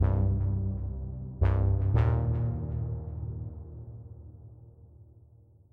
drum and bass synth loop dnb 170 BPM key C